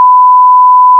Beep 1 sec
A Bleep or Beep to censor words
voice, deep, alphabet, english, words, speak, woman, voice-over, vocal, girl, man, text, human, american, male, bleep, beep, request, swear, female, cover, sexy, talk, spoken, speech